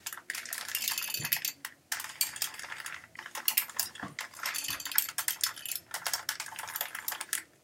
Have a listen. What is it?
Gears Sound Fx
Fx, Gears, Sound